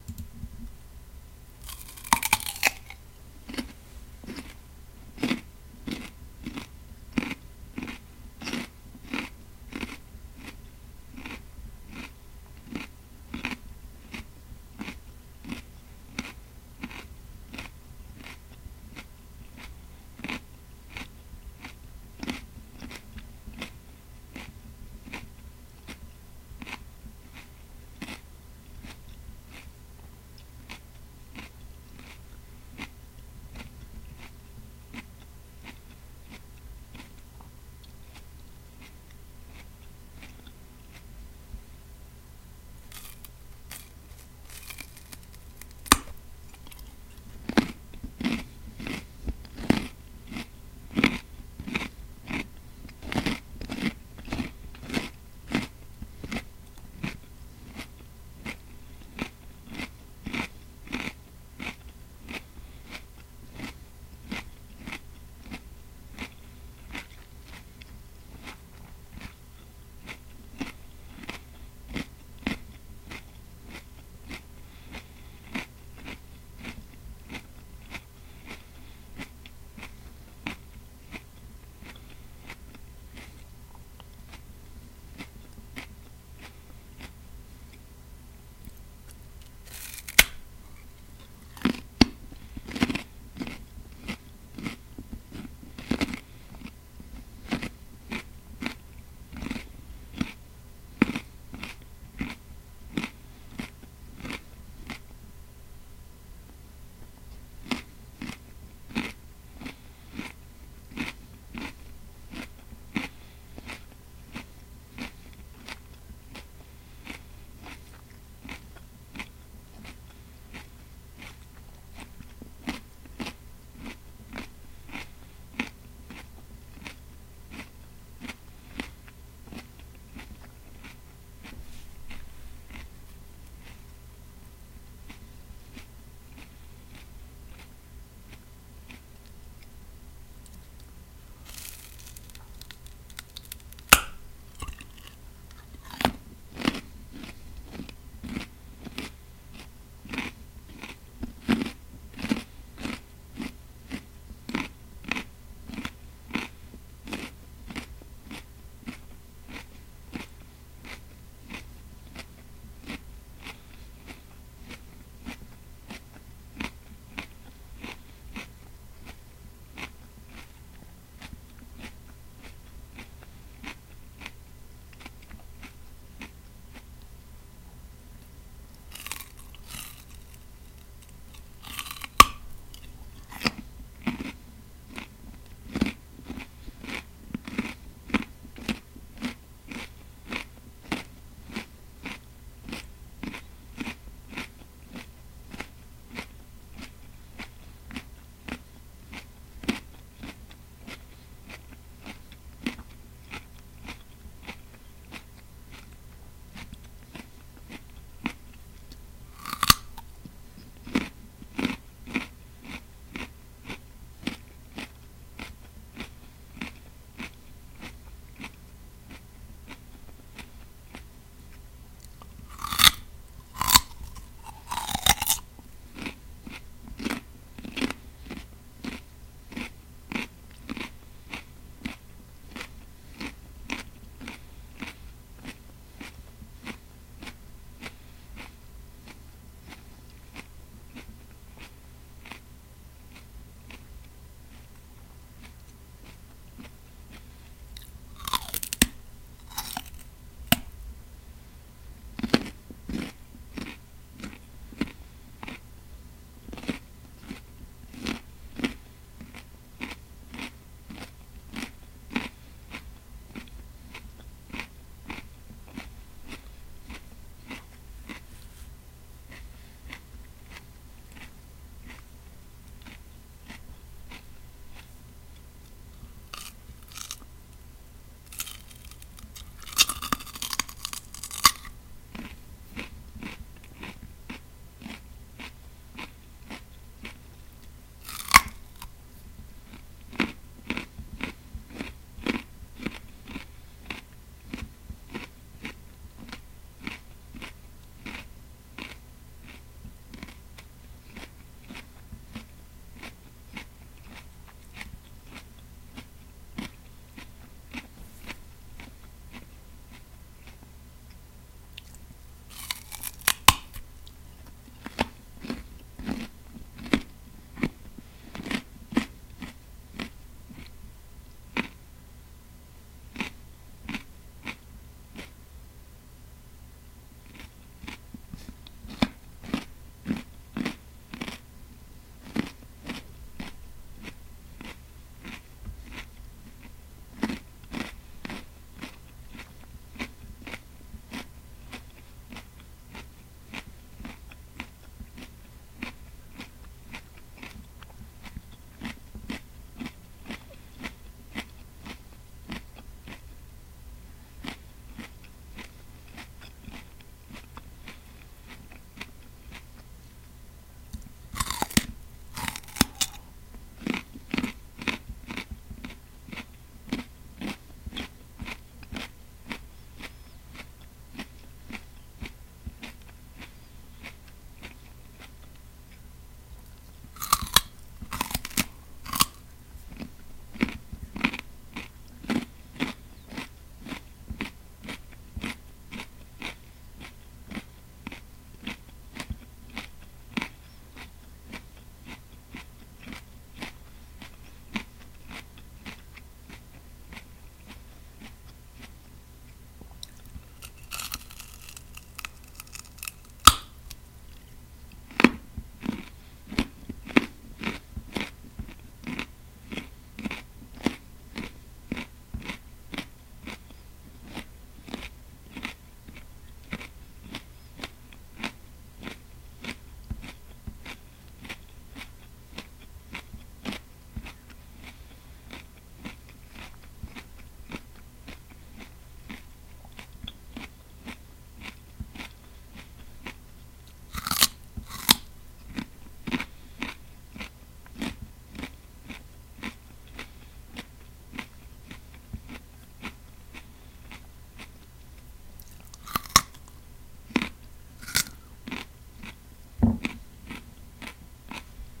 I eat a carrot
In this royal jelly of acoustic artistic expression the creator, Me, eats a delicious, sweet and crisp carrot which was orange.
My chewing frequency varies from a big open chew, where I bite off a piece of carrot and make this piece more little with the help of my teeth and my tongue many times, to 2 or even 3 bite offs of carrot pieces.
This phenomenon comes from randomly occurring variations in human chewing habits.
Now it colors my poop orange thanks to carotene.
Additionally I now can see better in the dark thanks to the tremendous amount of vitamin A carrots have.
I recorded it with my USB Microphone S01U from Samsung in Ableton Live.
Thank your for your interest.
carrot, chewing, delicious, eat, eating, orange, vegetable